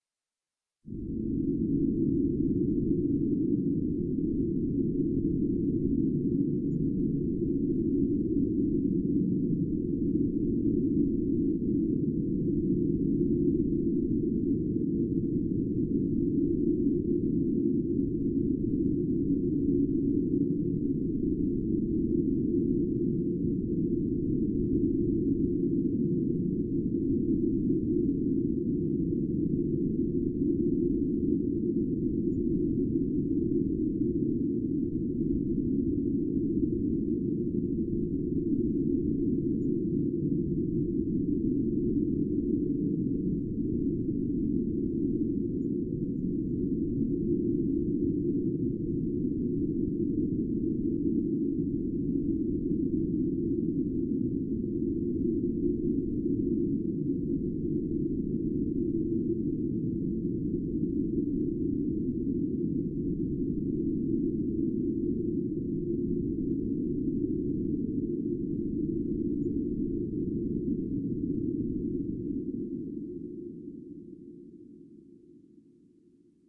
orbital bg10
ambience, ambient, atmosphere, deep, drone, effect, energy, hover, machine, pad, Room, sci-fi, sound-design, space, starship